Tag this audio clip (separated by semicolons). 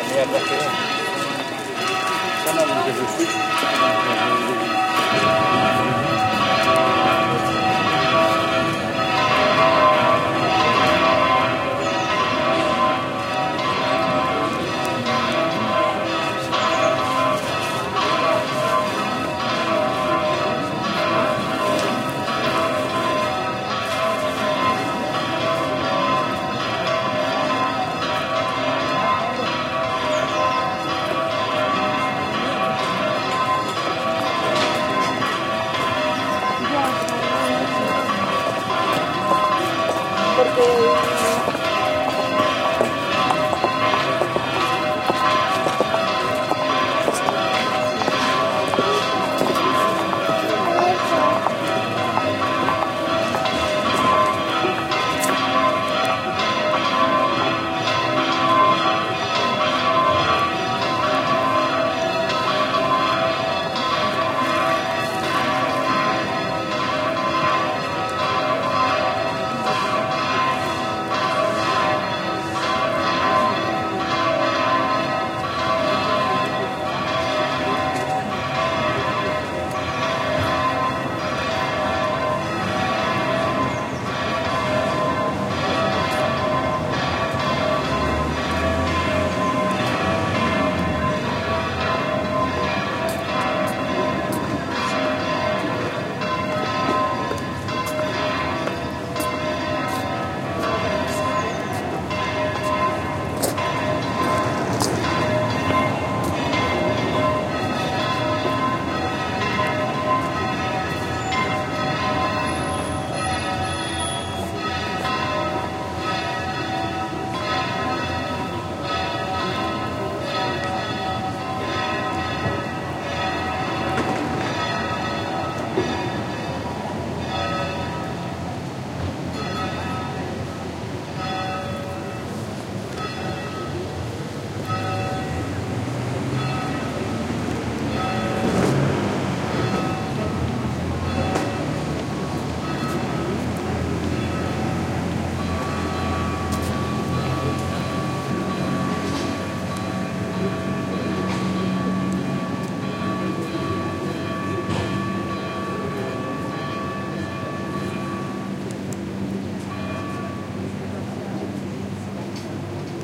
church-bells; ambiance; city; field-recording; south-spain